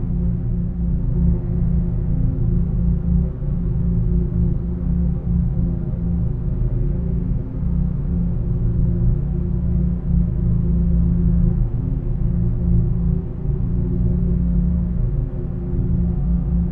A seemless loop of ambient background noise kind of like engine noise, which is relatively steady despite micro-modulations. This is from an Analog Box circuit created for the purpose.